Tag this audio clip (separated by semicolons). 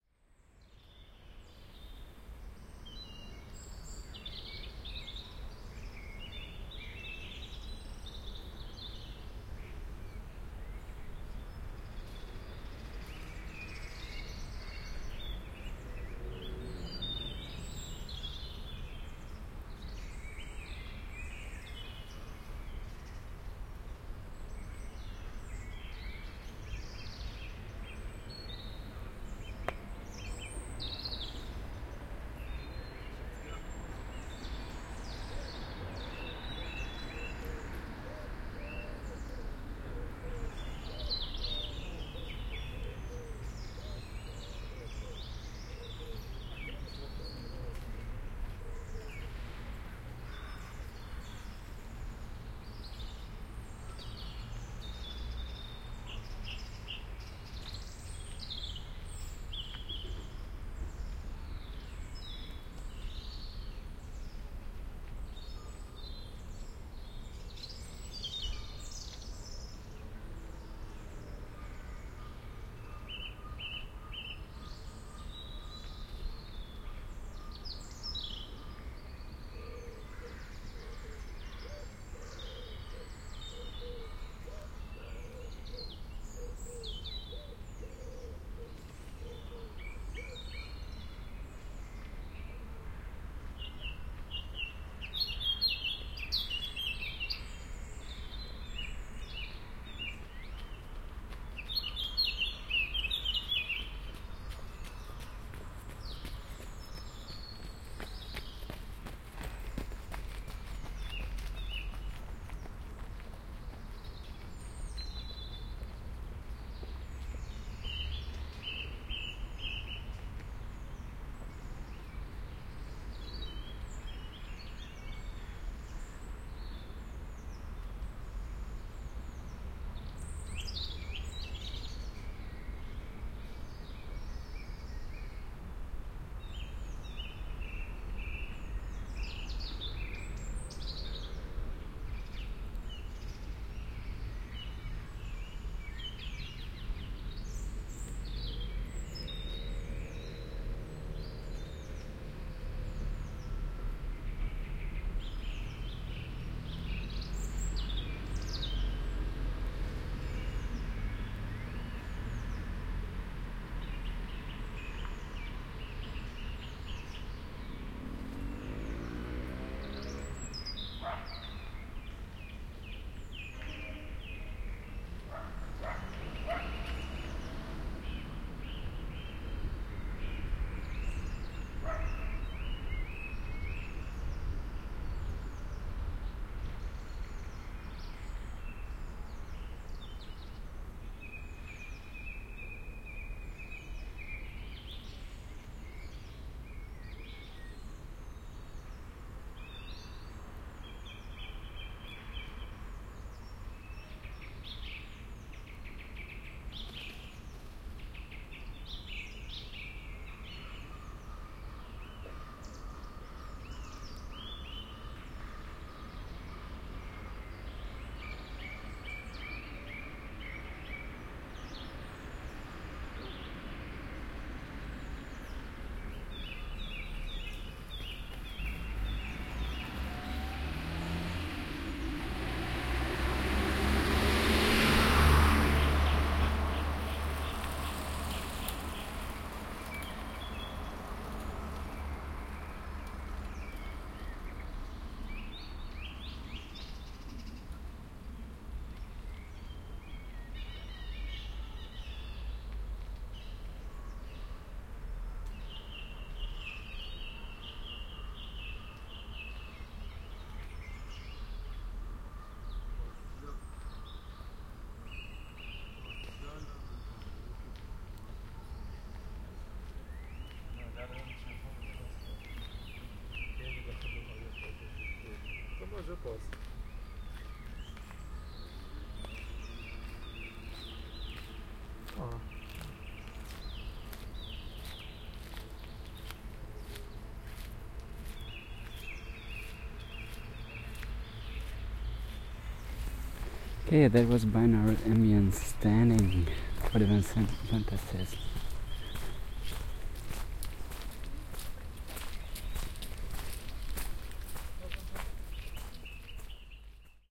ambience; announcement; atmosphere; binaural; confinement; ConfinementSoundscape; corona; covid19; empty-spaces; field-recording; loudspeaker; metro; paris; station; subway